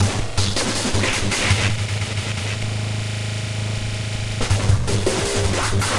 Drumloops and Noise Candy. For the Nose
experimental, electro, acid, drums, glitch, electronica, rythms, sliced, breakbeat, idm, hardcore, processed, extreme, drumloops